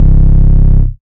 BassOne long
dsi evolver analog
analog, evolver, dsi